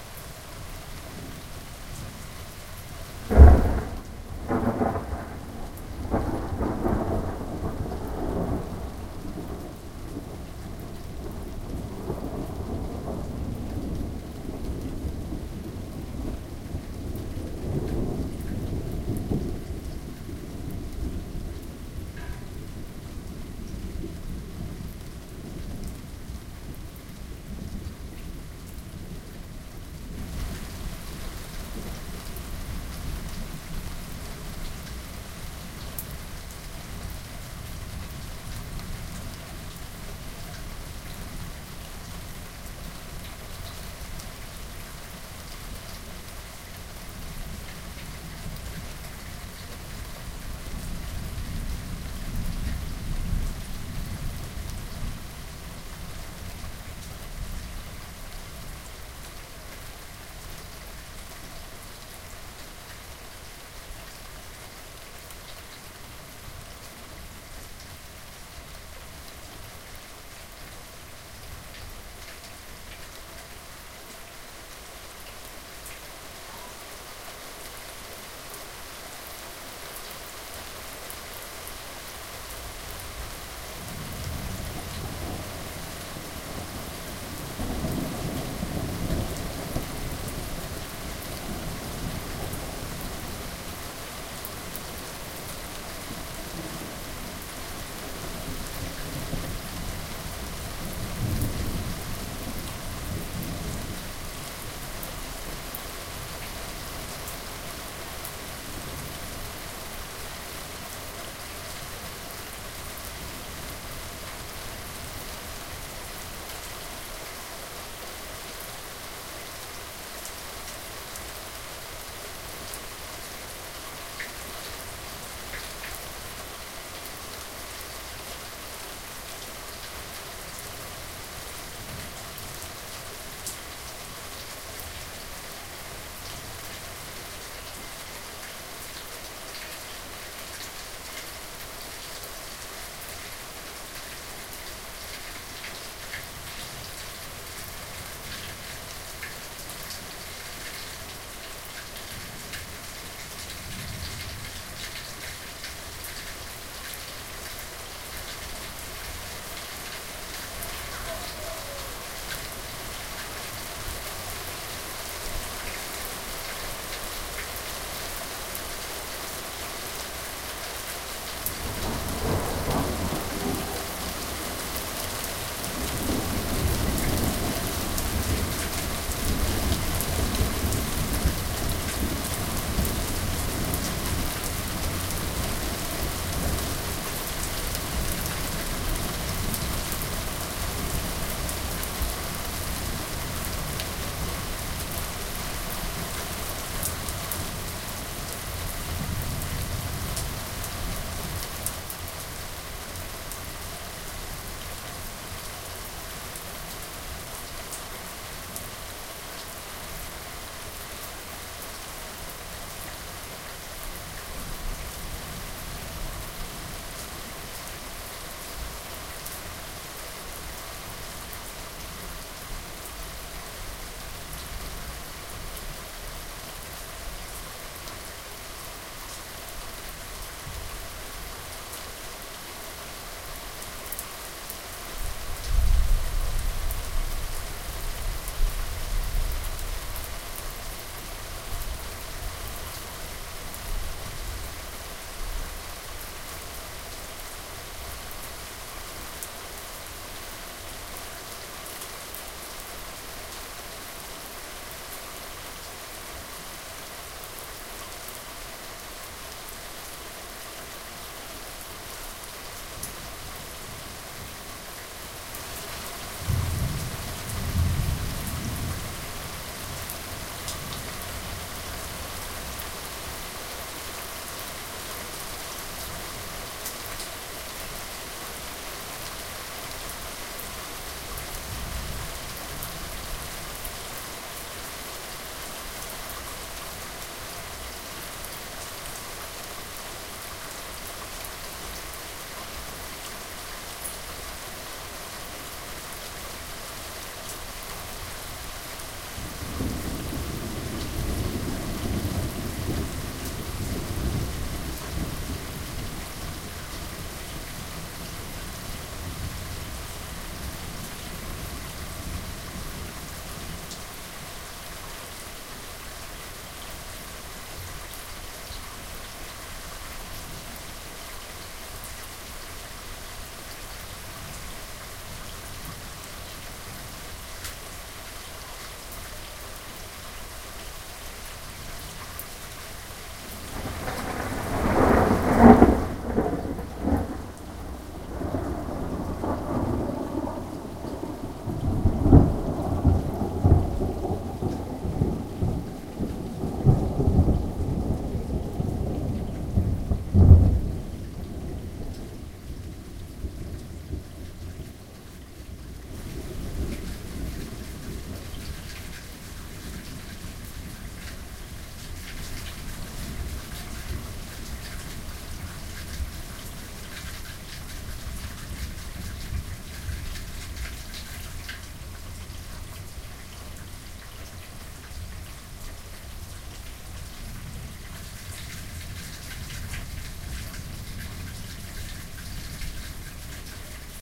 Seattle Thunder Storm 9-7-2019 #2
Thunder storm in Seattle 9-7-2019
rain, storm, thunder